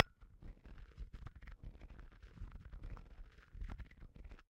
Two small glass holiday ornaments being rubbed together. Low noisy sound. Fair amount of background noise due to gain needed to capture such a soft sound. Close miked with Rode NT-5s in X-Y configuration. Trimmed, DC removed, and normalized to -6 dB.
low, ornament, glass, noisy, rub